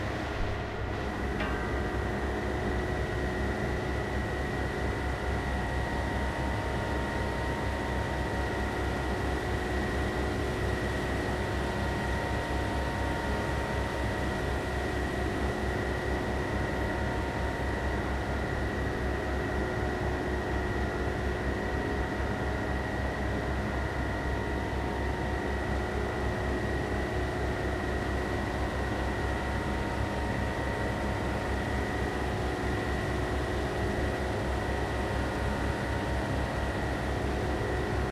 Fan Ventilation Mono 6
Recording made of ventillation i Lillehammer Norway
ambiance, ambience, ambient, atmos, atmosphere, background, background-sound, cirty, general-noise, ventilation